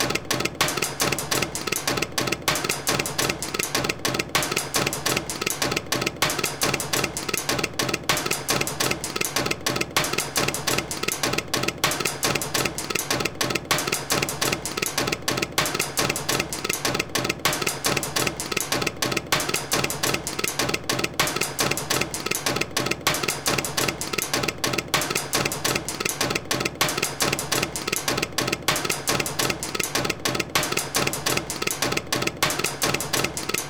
Mystericus Apparatus Loop
A constantly noisy mechanical contraption. Might be cool to be use this in a game, at least that's my dream here.
Made for an OpenGameArt friendly competition.
bang, bash, bashing, broken, constant, engine, glass, hydraulic, iron, loop, looping, mechanical, mechanism, metal, metallic, motor, object, oil, old, pump, retro, scratch, seamless, steam-engine, steampunk